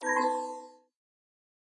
| - Description - |
Notification-style sound
| - Made with - |
Harmor - Fl Studio.
For science fiction projects or whatever I wanted.